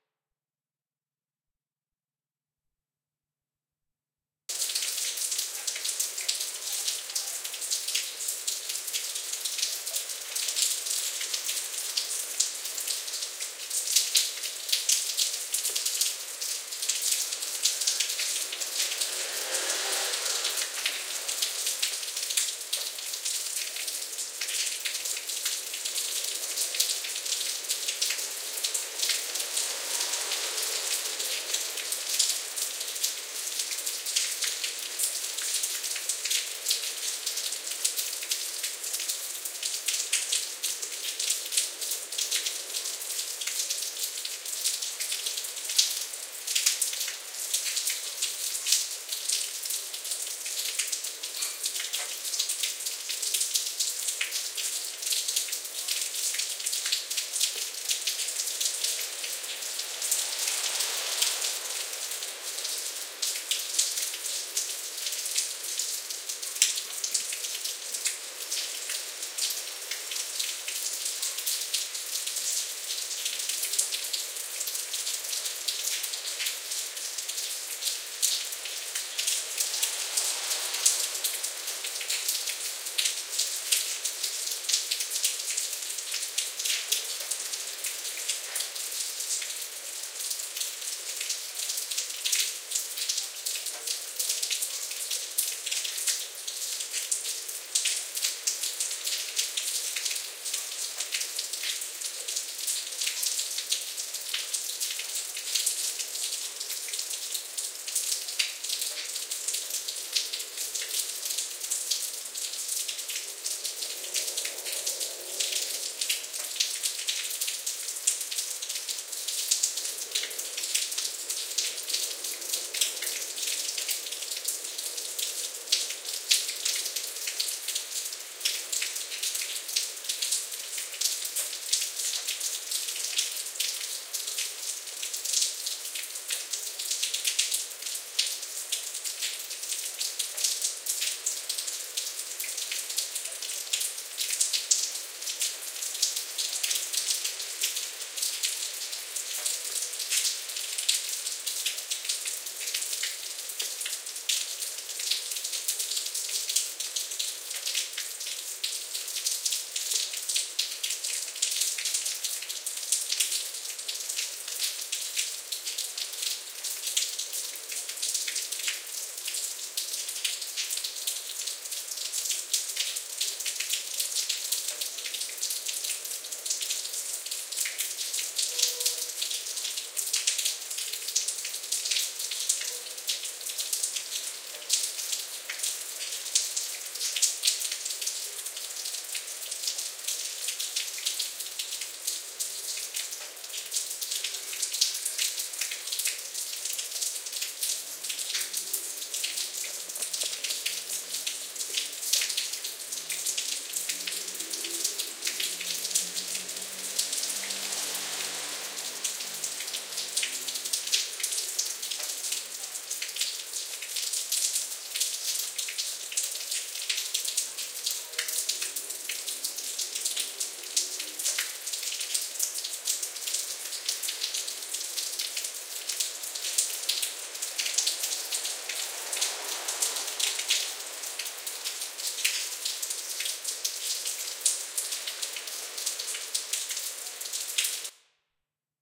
lluvia stereo, rain
Pure direct unprocessed console sound.
field-recording, moto, rain, raindrops, stereo, trafic, water, weather